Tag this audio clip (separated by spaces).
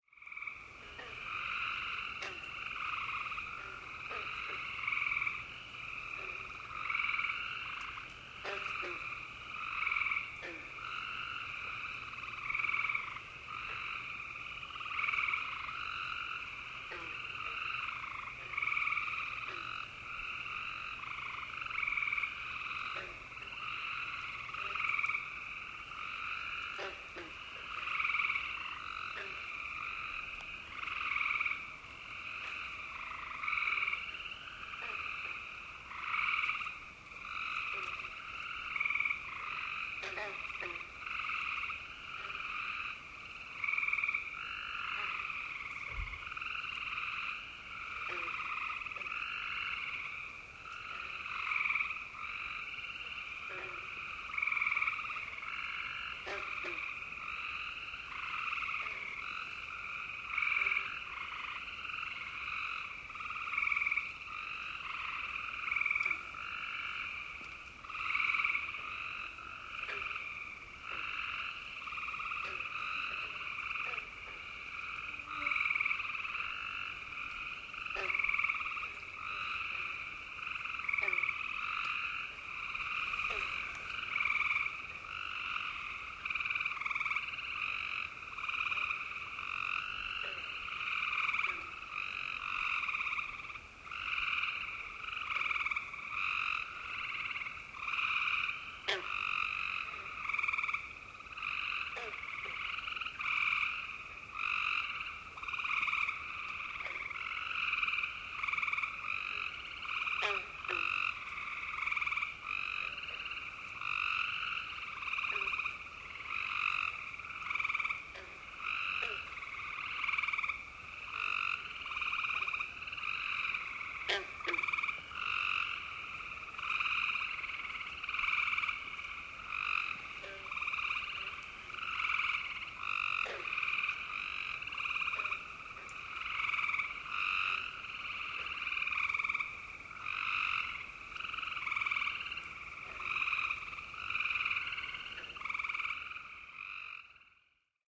wetland wisconsin amphibians toads lake frogs swamp pond